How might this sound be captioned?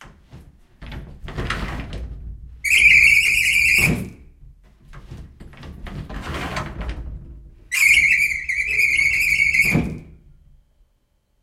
slicing door2
Slicing door on a hotel's wardrobe. It is quite broken so it sounds squeaky and I need lot of energy to open and close it. Recorded with Zoom H1.
close, door, hotel, open, squeaky, wardrobe